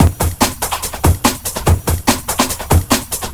Hardbass
Hardstyle
Loops
140 BPM